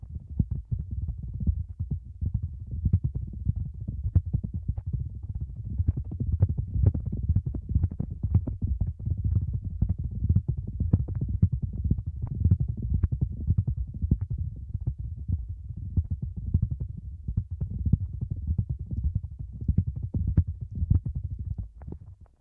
rolling an apple in a hollowed out pumpkin; recorded with a Zoom H2 to Mac/HD